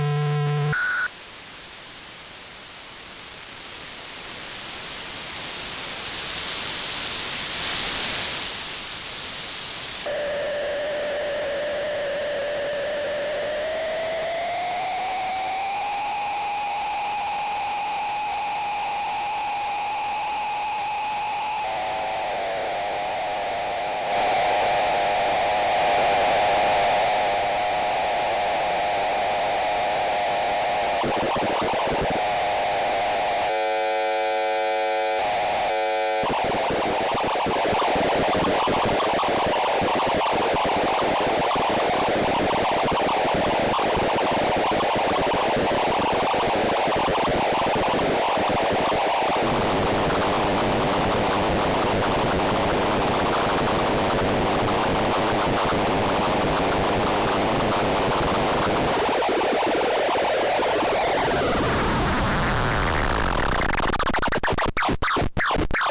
scary, creepy, unsettling synth noises. They may be very effective if matched with a rapid succession of disturbing images.